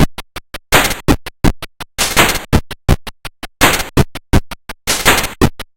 Atari XL Beat 1
Beats recorded from the Atari XL